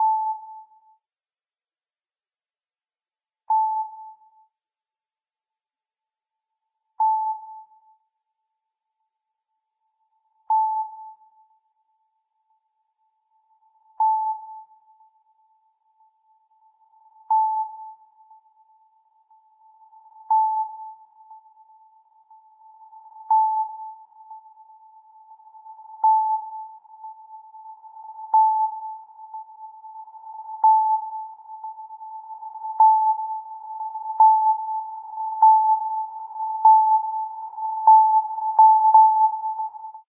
Sonar [5ch]
A sine wave with a reverb and delay, with a reverse-reverb underneath.
This time with five channels for, hopefully, more depth.
surround, underwater